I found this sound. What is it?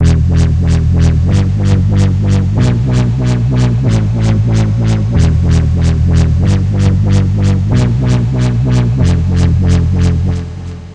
*x*
I hope this was usefull.
Dub, dubstep, effect, LFO, pretty, sub, substep, wobble, Wobbles